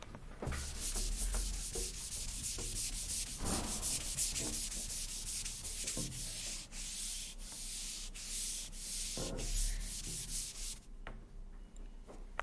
mysound Regenboog Osama
Sounds from objects that are beloved to the participant pupils at the Regenboog school, Sint-Jans-Molenbeek in Brussels, Belgium. The source of the sounds has to be guessed.
Belgium, Brussels, Jans, Molenbeek, mySound, Regenboog, Sint